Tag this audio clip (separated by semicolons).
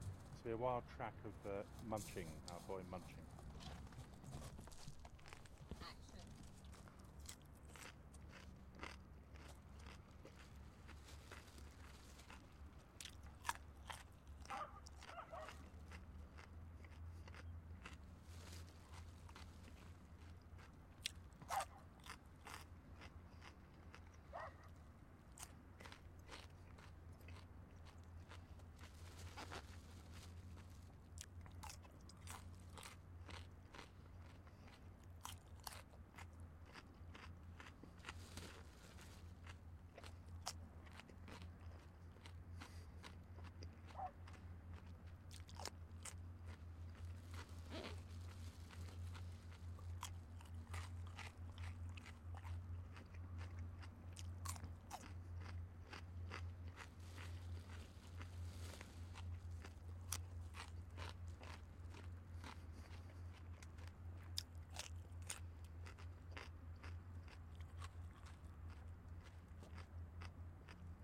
cucumber; eating; munching